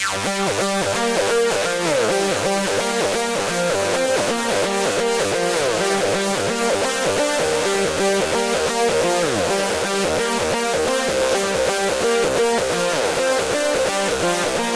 Chaser 2 Flicker
2nd phase to chaser 1 with different bass theory.